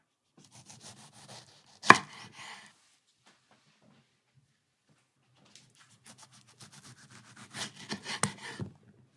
Cutting tomato
Slicing a tomato on wooden cutting board
cut, fruit, slice, tomato, vegetable